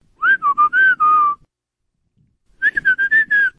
mentada silbido
Silbido para insultar a alguien.